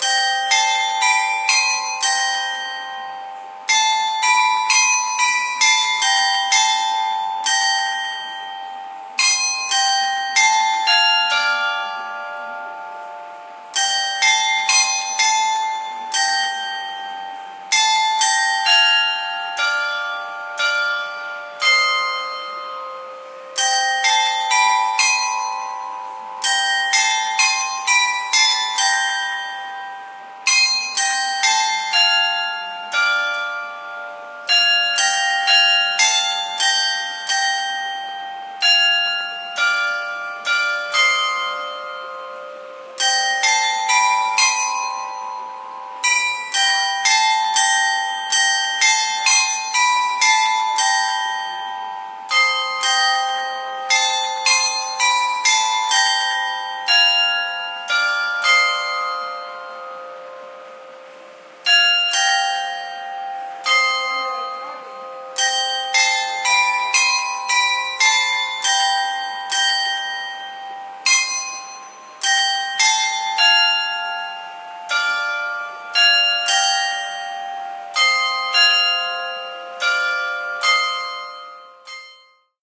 A recording of a carillon clock (automated musical bell ringing) in the Fountain Centre, Belfast. This one is called the Alice Clock, came from Hamburg and was installed in 2000. It is comprised of 24 bells and includes a show of animated figures based on the characters of Lewis Carroll's Alice in Wonderland.
This was very much a spontaneous grab using a small Sony A10. The recording is abbreviated on account of unusable sections with wind interference. I will try and go back and get a better recording using better equipment some time. The clock chimes every hour during the day, playing a different tune each time.